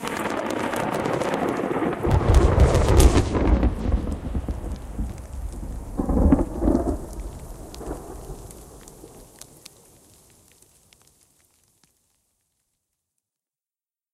A close high amp lightning strike that knocked out power on the 6th of october 2014